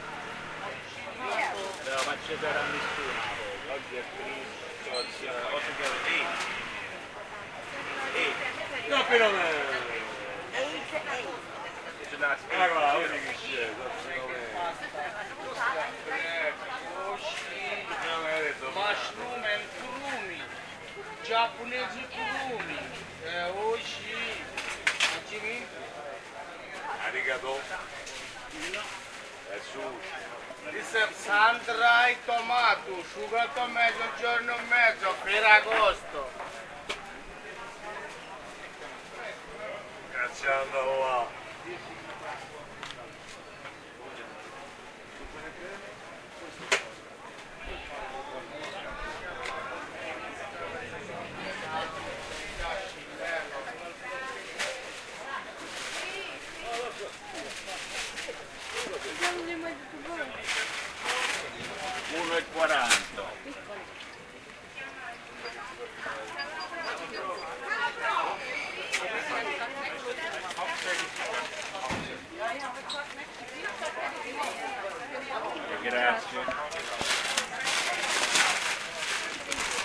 Campo de Fiori, Rome. Peoples are byuing vegetables, fruits, etc. Vendors present their products.